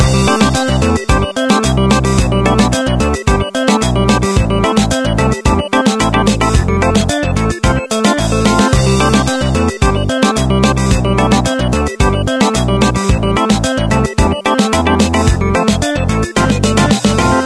Dolphin ride-short
You can use this music as a background music for a game....cheers
Video, Game, Sound, Design